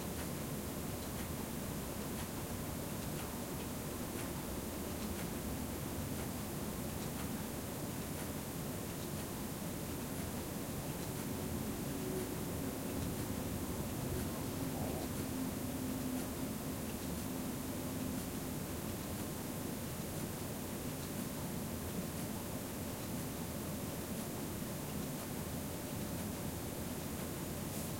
Room Tone With Ticking Clock 2
A quick recording for anyone who needs a ticking sound. (part 2)
stereo, free, denoised, h5, quality, foley, zoom-h5, zoom